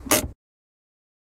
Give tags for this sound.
car; break; vehicle

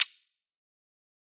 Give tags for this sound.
application; terminal; computer; click; space-ship; interface; UI; futuristic; menu; game; command

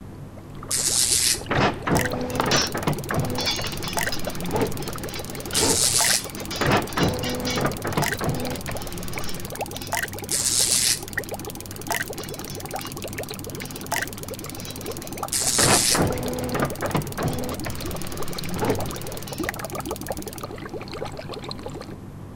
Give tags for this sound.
horror; mad; sci-fi; steampunk; laboratory; effect; scientist

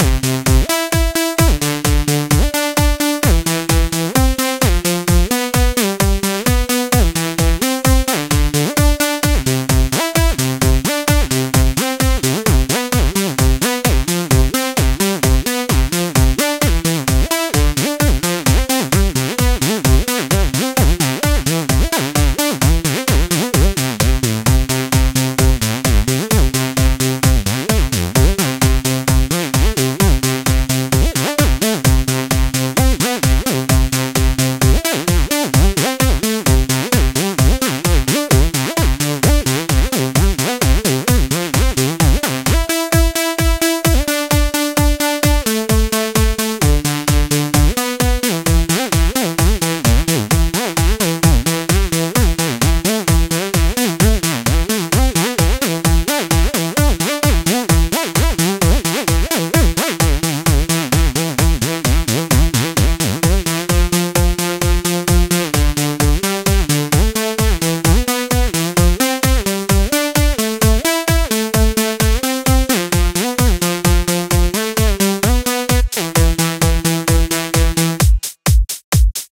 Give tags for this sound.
bgm; flstudio; hiphop; music; rhythm; sonic; theme; tone

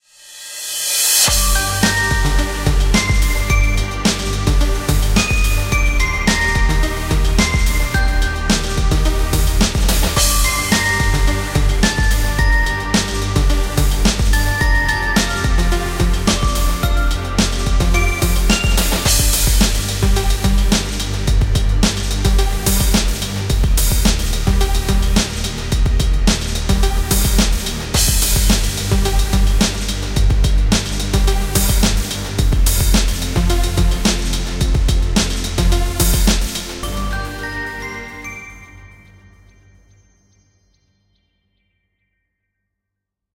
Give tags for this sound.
beat; beats; credits; dance; drug; drugs; electronic; end; hard; hitting; music; old; outro; power; powerful; rave; scene; sequence; skool; techno; trance

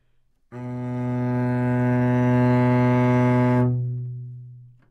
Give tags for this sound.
B2; cello; good-sounds; multisample; neumann-U87; single-note

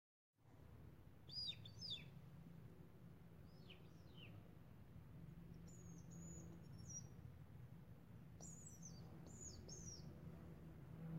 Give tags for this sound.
ambient; atmophere; recording

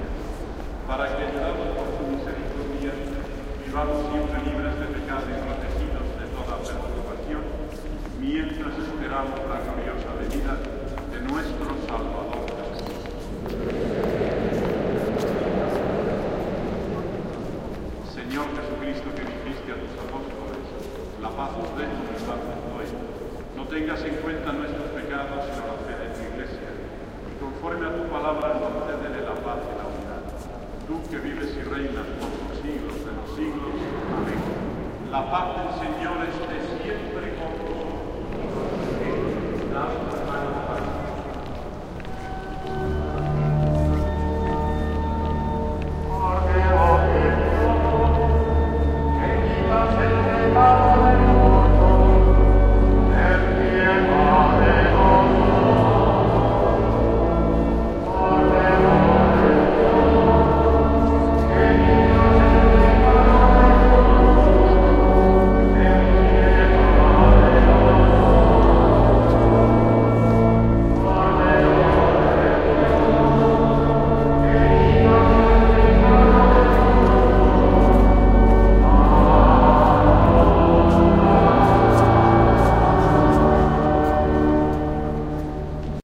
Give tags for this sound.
chanting; church; sevilla

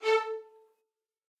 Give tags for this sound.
multisample; midi-note-69

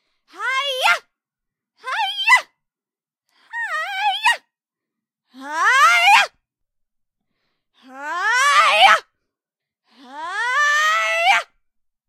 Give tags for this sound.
english; female; fighting; vocal

